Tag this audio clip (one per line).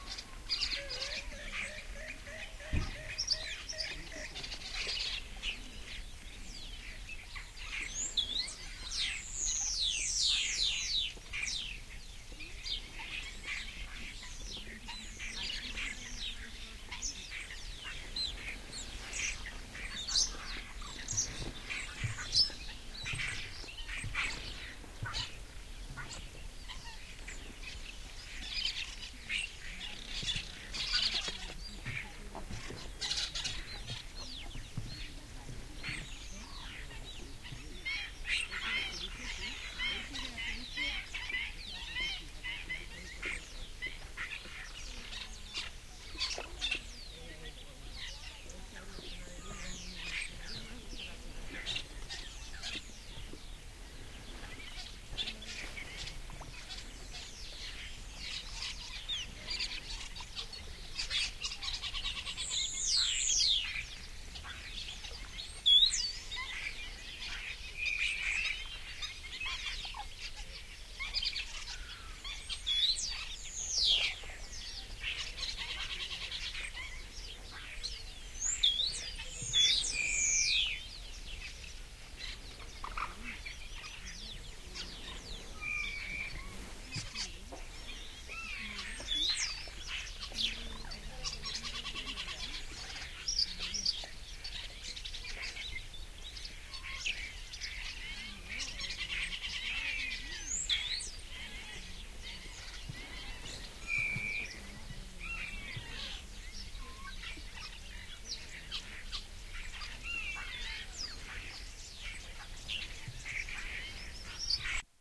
ambiance
autumn
birds
nature
pond